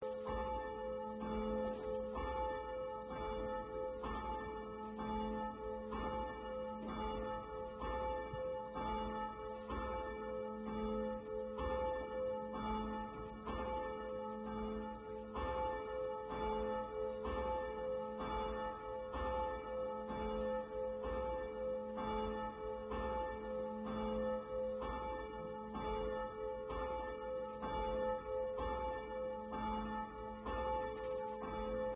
Sunday church-bells. Recorded with H2N, no editing.